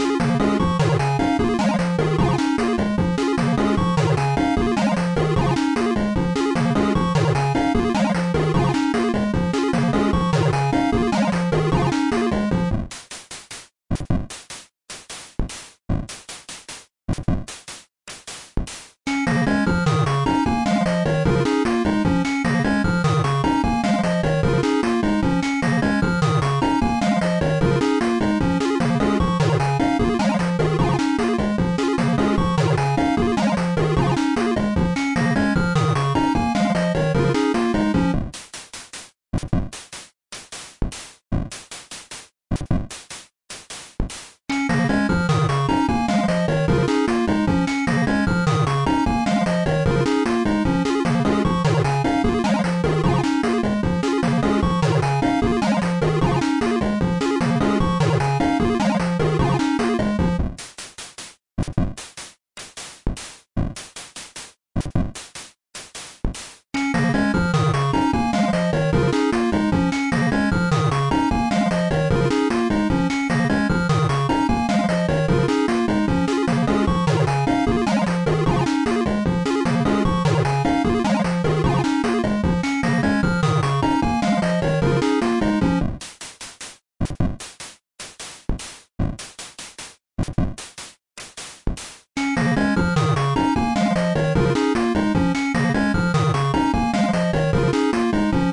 Cool Techno
I made it using ModBox. It possibly could be good for a video theme or something like that. though it sounds a LOT like my other ones.
Thanks!
TLR, TheLowerRhythm, VST, beep, beeping, digital, electromechanics, glitch, harsh, lo-fi, mechanical, robotics, weird